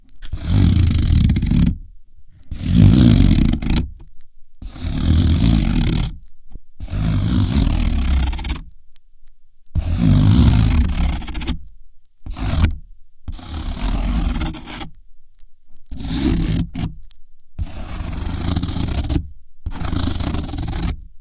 CardboardScrape-Piezo
Thick scraping sound on cardboard. Contact microphone recording with some EQ.
cardboard, scraping, scratching, scrape, scratch, sliding